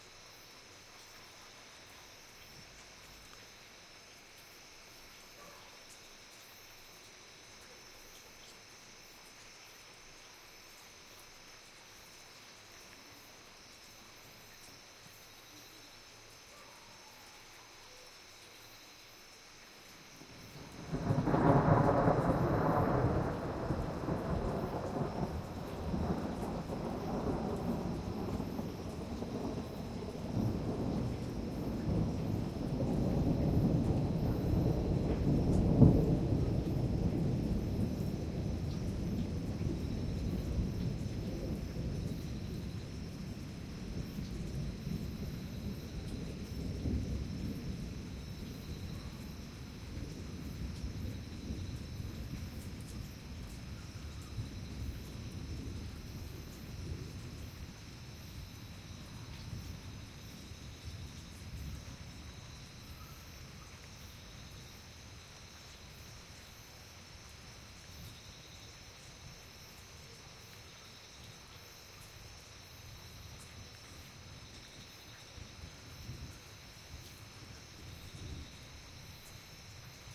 Dry rolling thunder Mexican jungle
Dry rolling thunder before storm near a small village in the mexican jungle
MS encoded
field-recording, night, water